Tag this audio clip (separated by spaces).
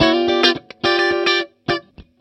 108 bpm clean funky g7th guitar strat